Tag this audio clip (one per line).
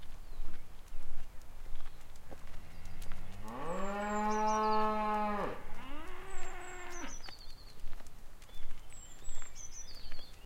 countryside
cow
farm
farm-animals
lowing
moo